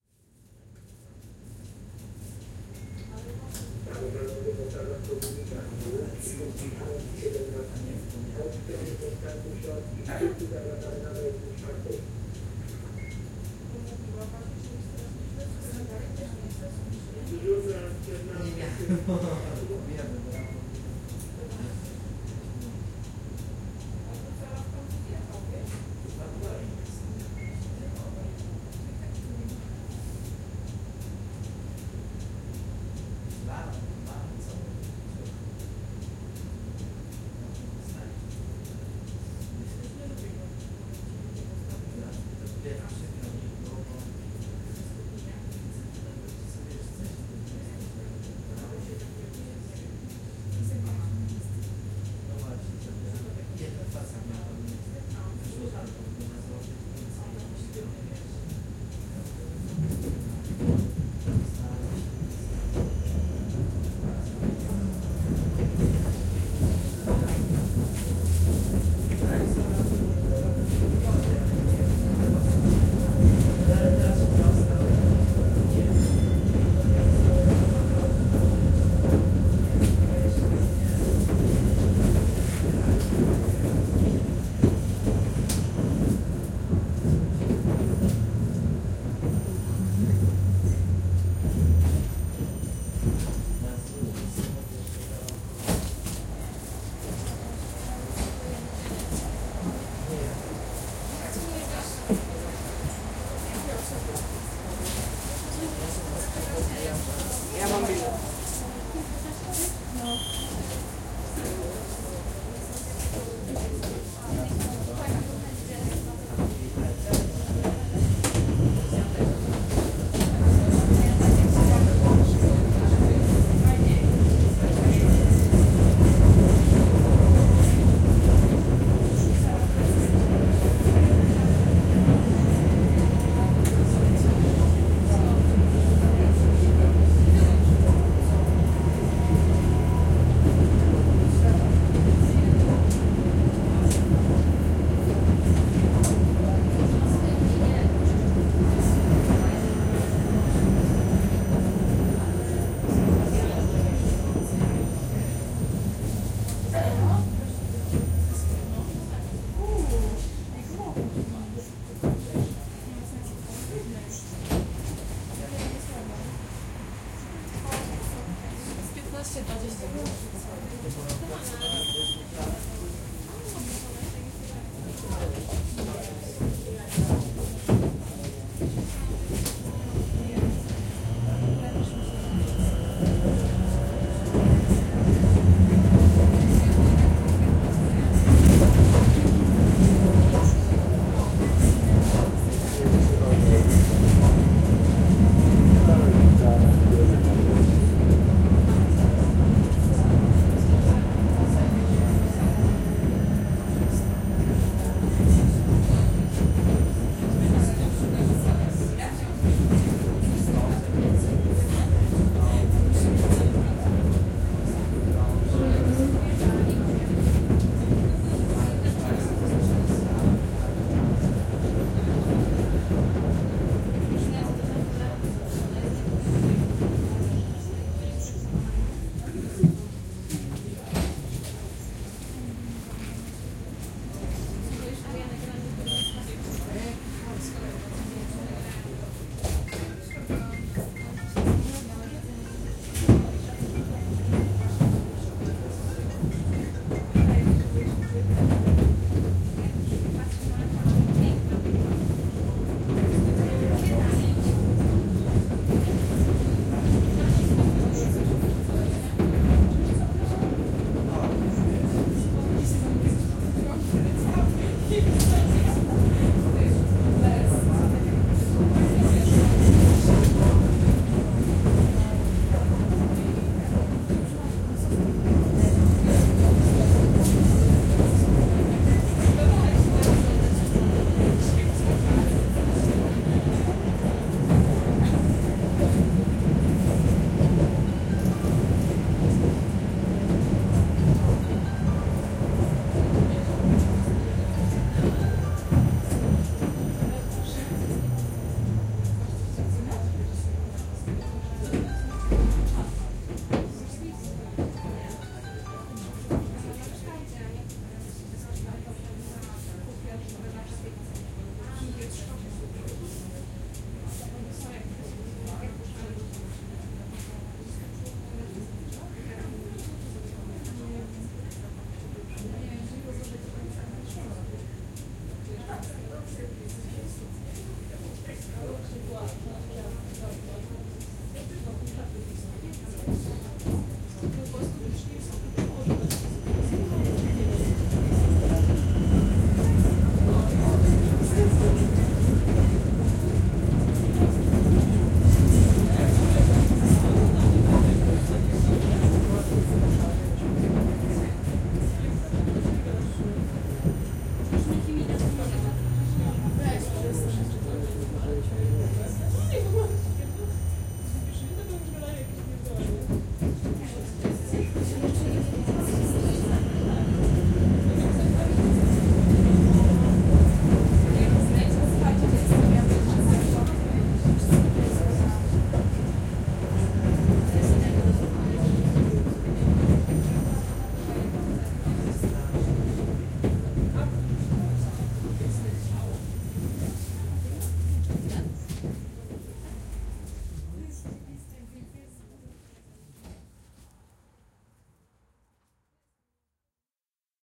A few minutes of tram ride in Wrocław / Poland
Recorded with Lenovo p2 smartphone.